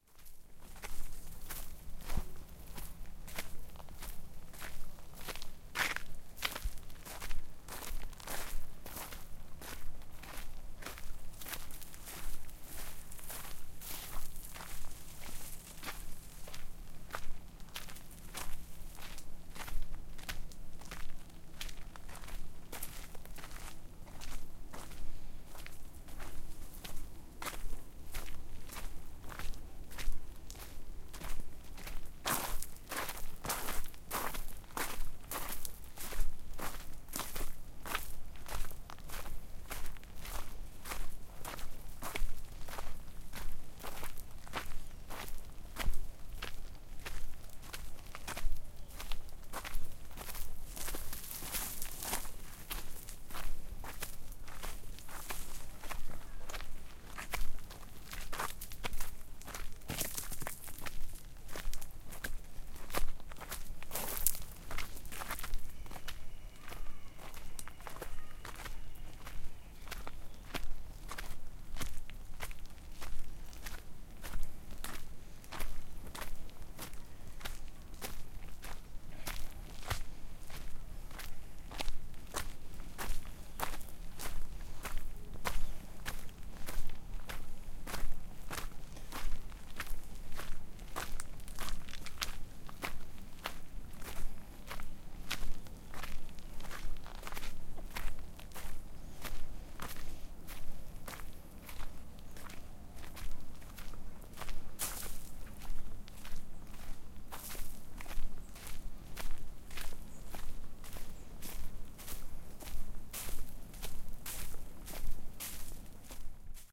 Forest walk in Marata - h4n
Walk in a forest in Marata. Recorded with a Zoom h4n on July 2015. This sound has a matched recording 'Forest walk in Marata - mv88' with the same recording made at the same exact place and time with a Shure mv88.
field-recording, footstep, forest, marata, walking, zoom-h4n